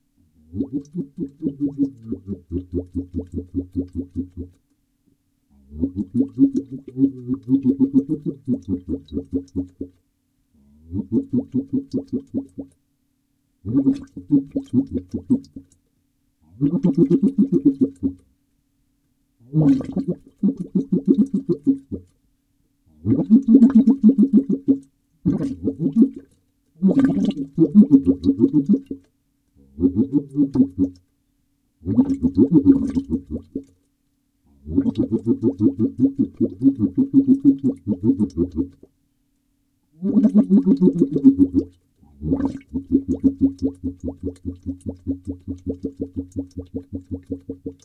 fish speaking to diver
Very big fish tries to present himself to human diver. No answer from human.
office, ships, jobs, mystery, home, fantasy